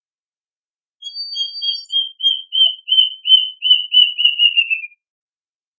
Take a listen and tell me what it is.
Plaintive cuckoo (Cacamantis merulinus)
Sony PCM-M10, Sennheiser ME66